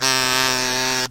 Multisamples of a green plastic kazoo in front of a cheap Radio Shack clipon condenser. Load into your sampler and kazoo the night away! RE
free, kazoo, multisample, sample, sound